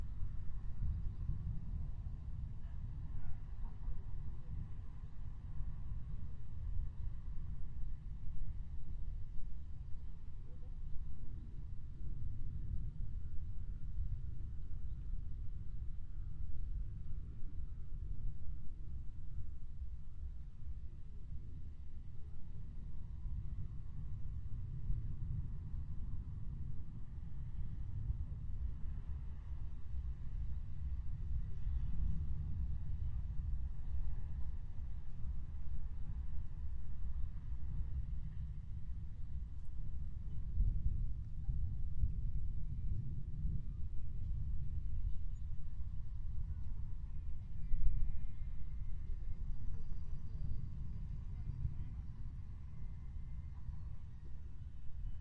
Distant Thunderstorm
Just my luck: the gear ready to record that distant thunder, which has been going on for quiet a while, when this bloke arrived with a "bike-radio"! Sad! Primo EM172 microphones into Sony PCM-D50.
field-recording, radio, rumble, thunder, thunderstorm